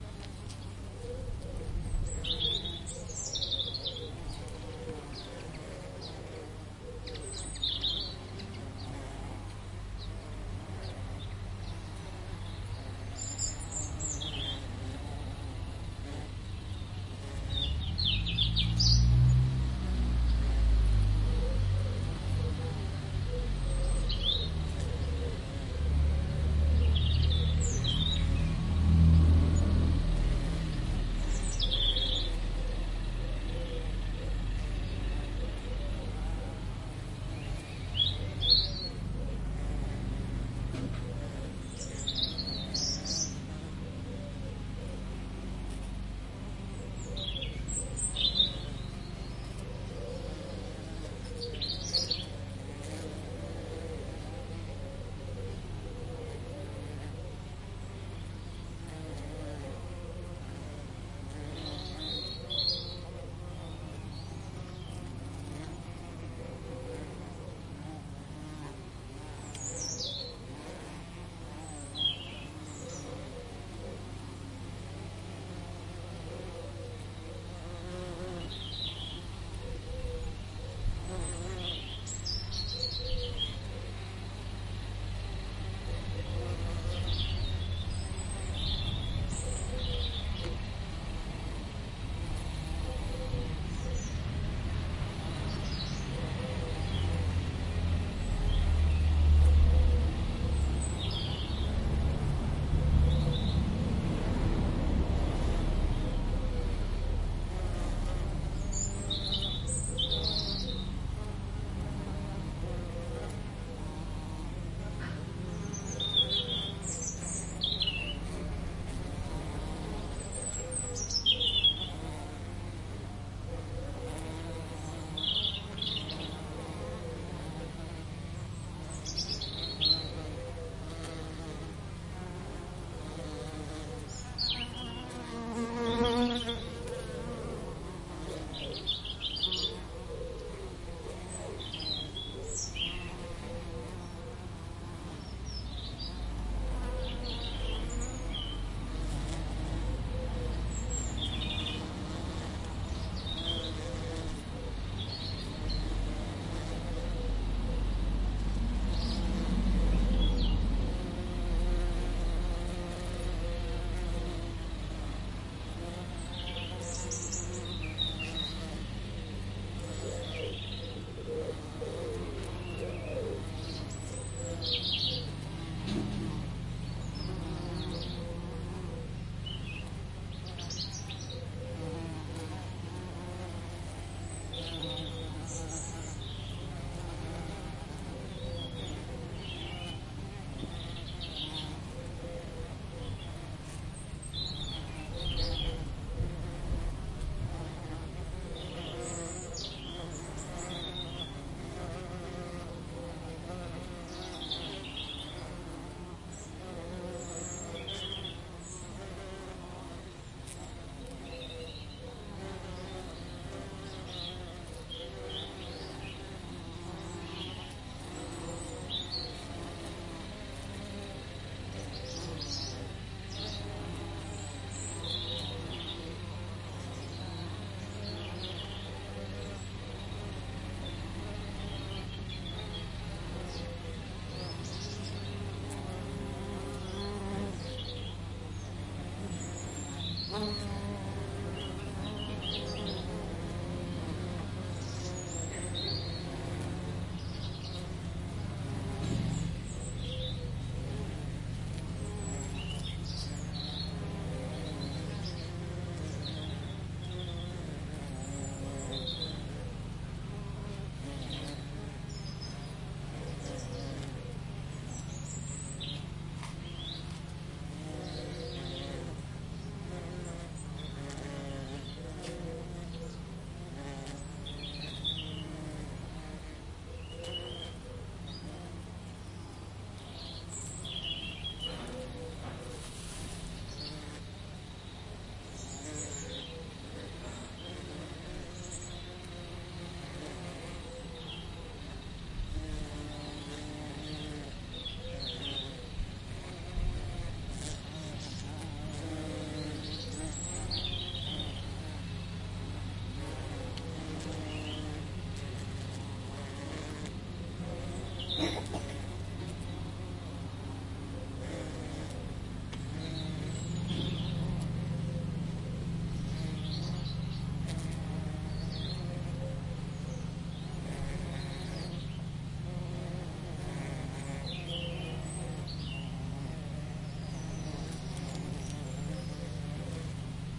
140907-Cornwall-lavender-bush
Recording of ambient sounds taken from the base of a lavender bush in a tiny hamlet surrounded by farmland. This is in the same area as the lawn I also uploaded, but gives a lot more emphasis to the insects, especially bumble bees on the lavender bush.
Recorded with a Zoom H2n in 2 channel surround mode.
ambient, bees, birds, bush, field-recording, insects, lavender, nature